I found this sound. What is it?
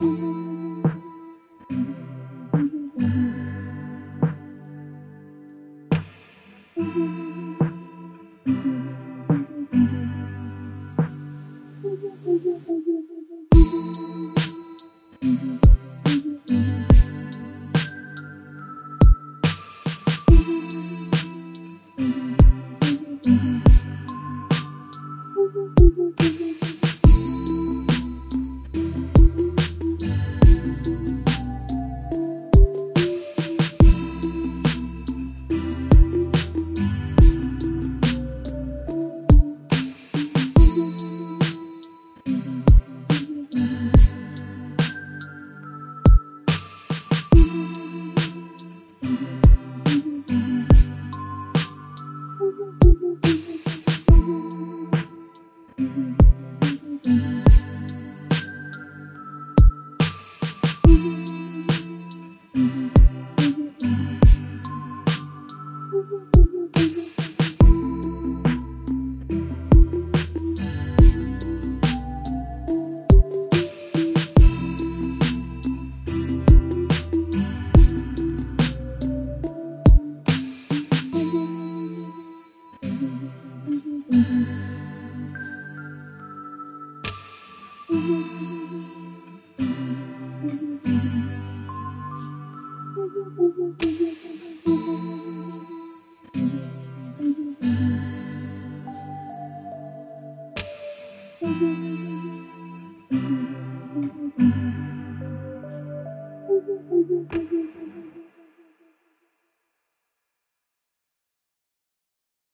Lofi Beat Loafy
Lofi beat to exist to.
beat, rubbish, tape, groovy, percs, lo-fi, perc-loop, background-music, drum-loop, lofi-beat, percussion-loop, drum, loop, lofi, vintage, garbage, quantized, percussion, song